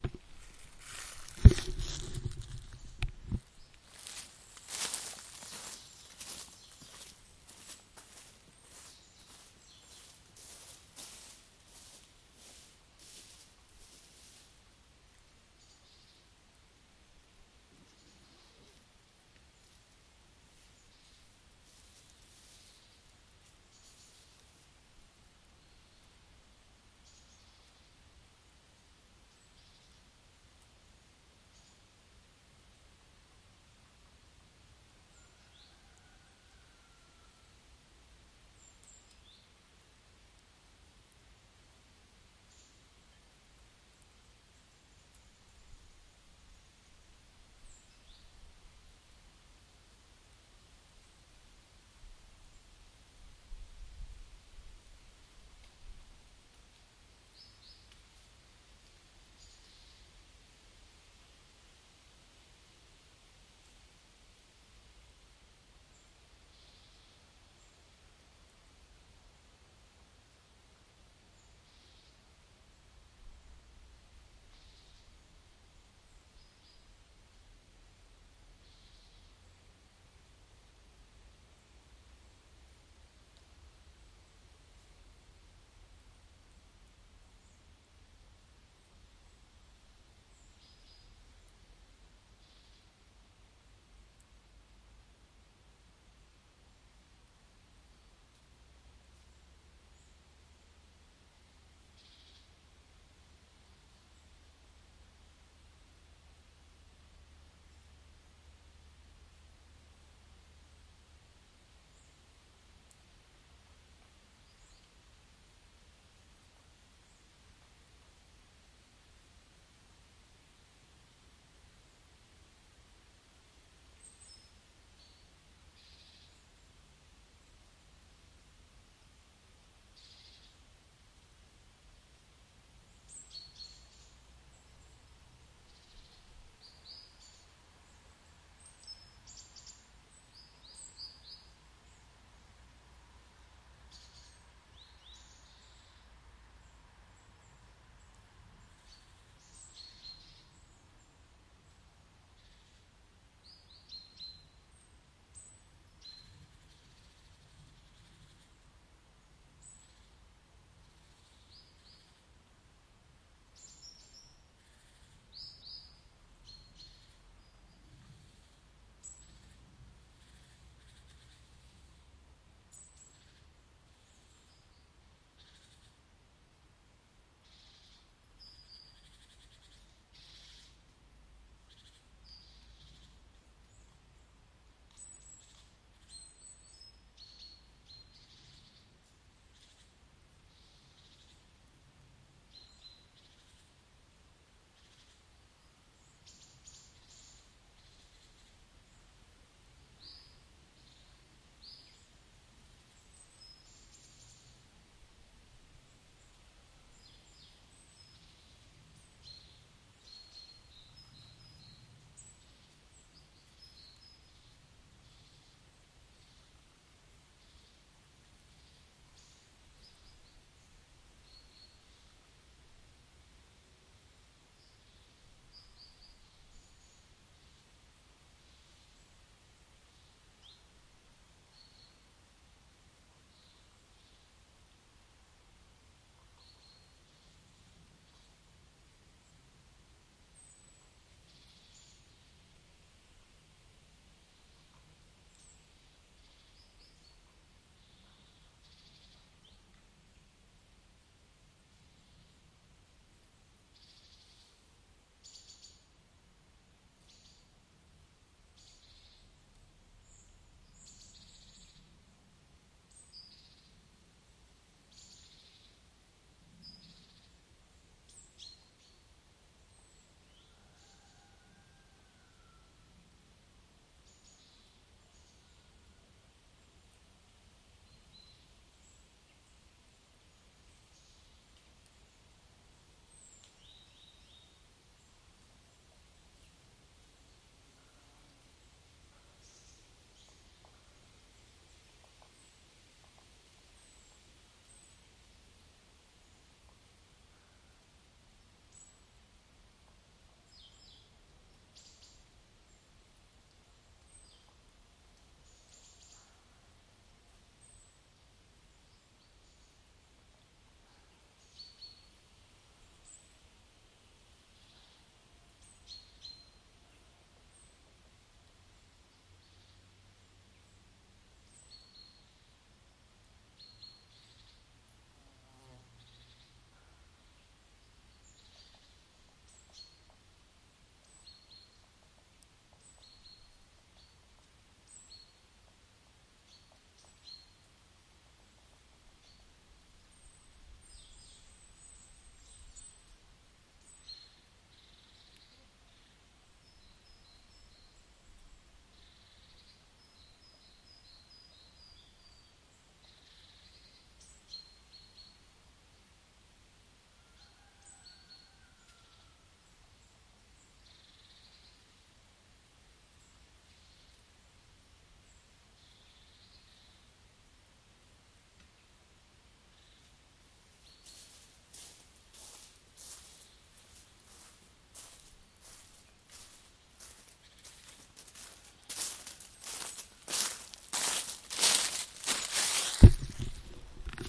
birds singing in the autumn forest - front
ambience, ambient, autumn-forest, bird, birds, birdsong, birds-singing, field-recording, forest, nature